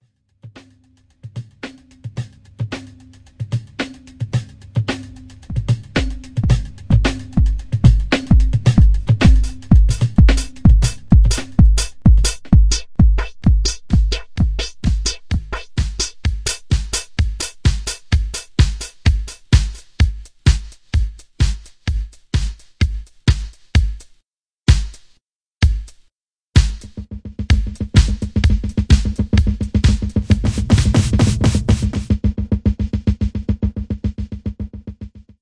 For this sound, I crossed some beats done with snare drum and other percussion instruments, I used fading effects, tremolo, phase and wahwah.
beat, crossing